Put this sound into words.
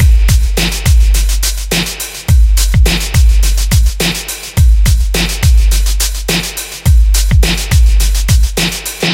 Download and loop.